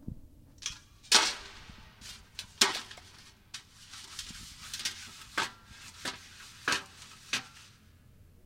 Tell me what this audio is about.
Trashcan Metal Hall
Trashcan/litterbin metal flap openened an closed. I did this in a big hall at school.
Recorded with Edirol R-1.